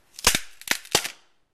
JBF Plywood Breaking 2
A piece of plywood, breaking. (2)